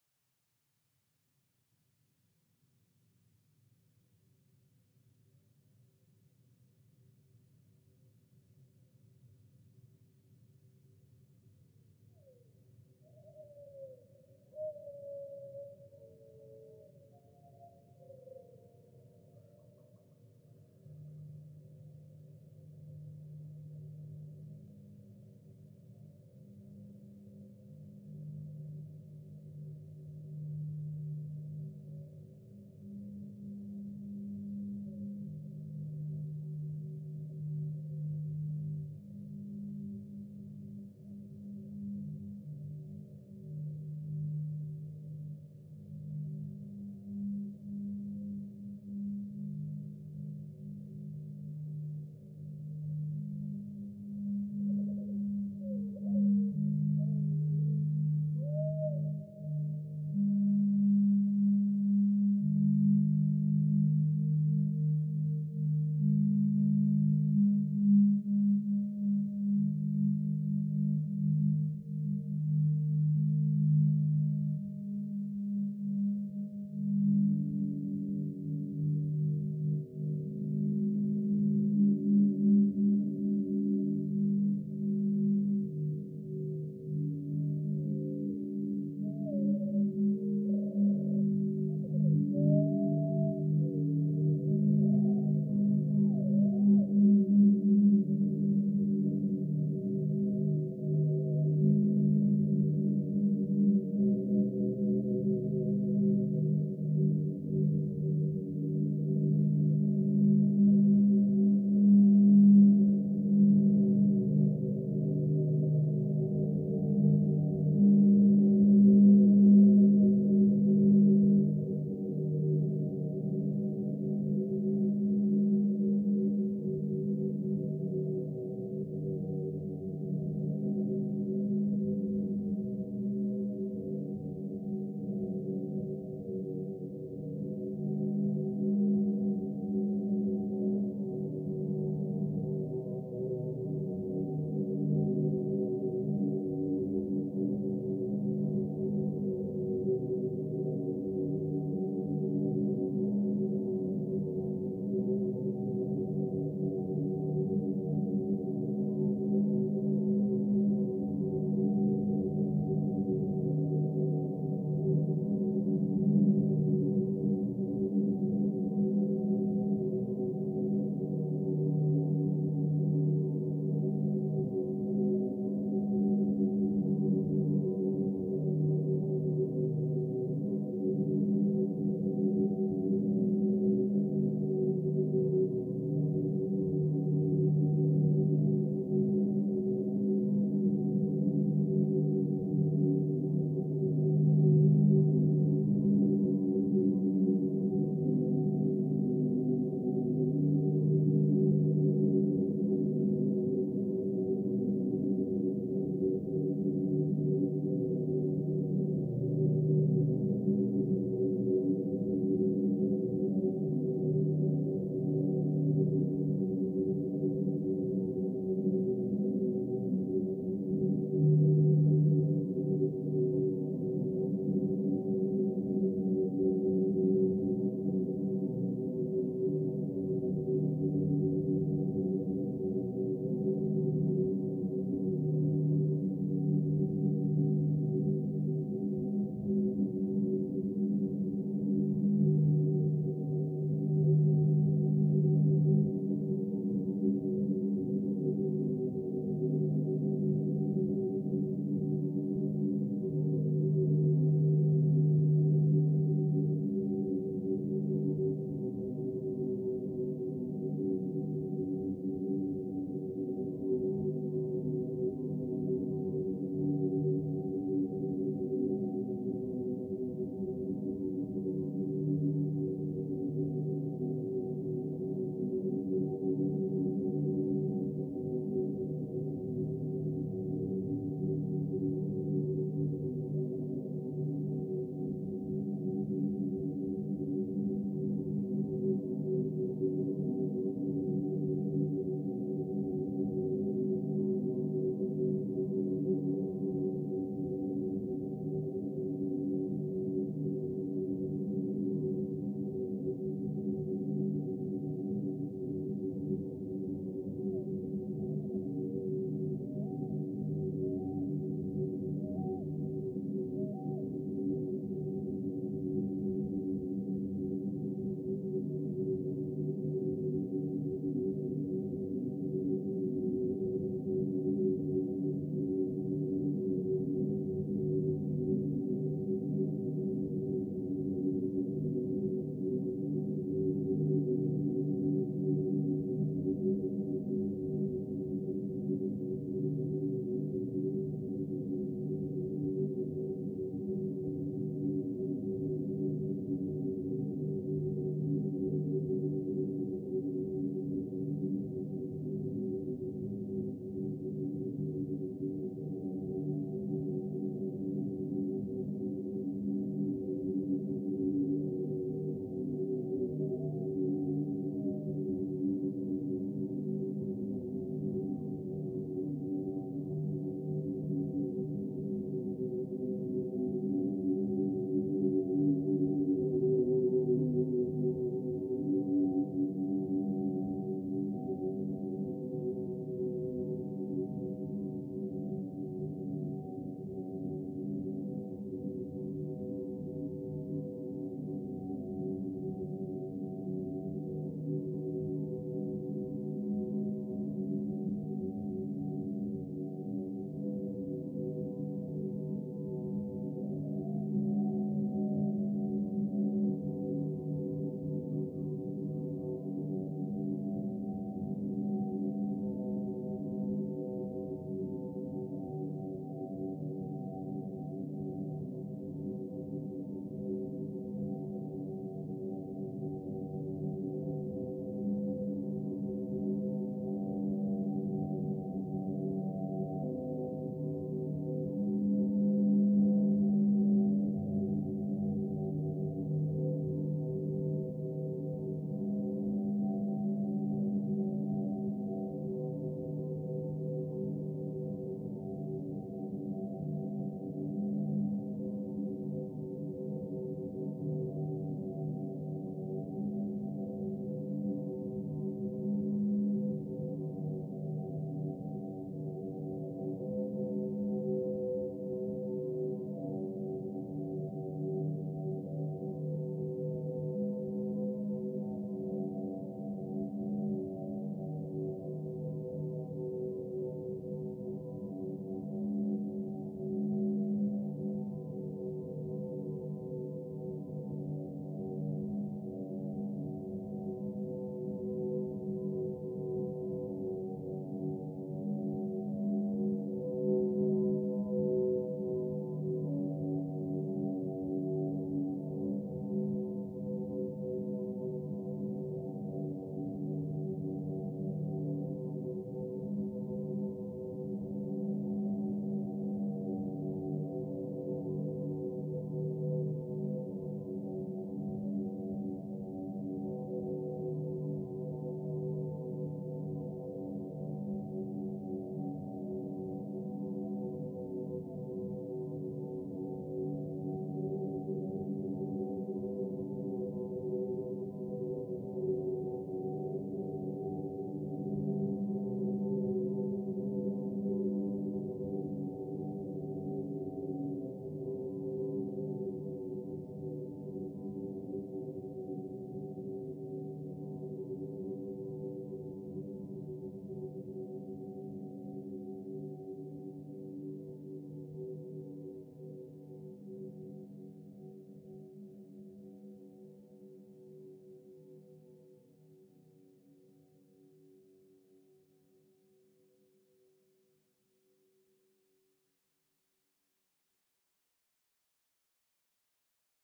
a pitched down recording of distant fire-trucks, that actually sounds like a minimalistic composition. other then pitching (without time correction) there were no additional effects used or any editing/layering done. I really like the occasional birds that the microphones captured, and how they sound pitched-down together with the sirens.
KM201-> ULN-2-> DSP.